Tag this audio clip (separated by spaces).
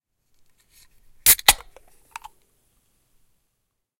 clap compact field-recording Foley hands mic microphone movement percussive sound-design struck transient